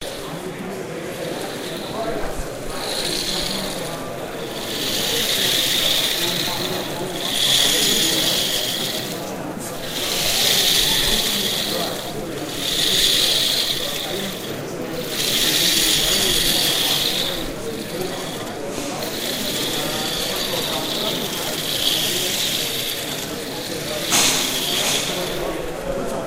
a robot moving and balancing on a single ball, recorded at an exhibition